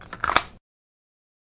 A S&W; 9mm Being Holstered.